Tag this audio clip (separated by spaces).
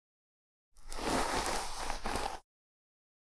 hairbrush CZ Panska Czech brush Pansk brushing